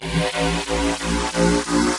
Square wave rising from A to slightly sharp with some modulation thrown in rendered in Cooldedit 96. Processed with various transforms including, distortions, delays, reverbs, reverses, flangers, envelope filters, etc.